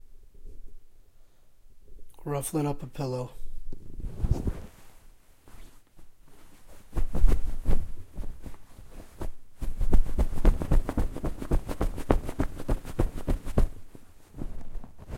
Shuffling Pillow
Used a shotgun mic to ruffle up a pillow.